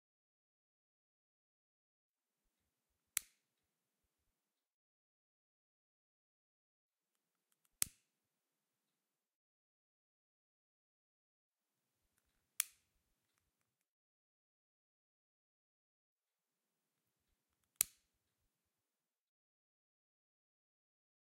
02 Piezoelectric lighter
Striking the piezoelectric lighter.
fire, striking, CZECH, Panska